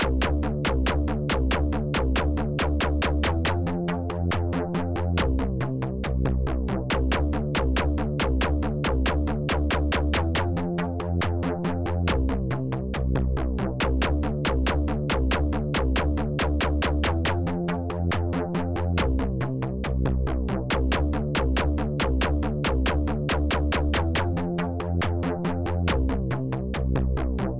rhythmic bass loop
Synth bass loop I created through my music production software.
bass-loop, electronic, electronic-bass, synthesizer-bass